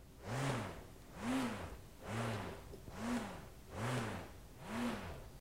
Buzz-bone
rotating, bone, ancient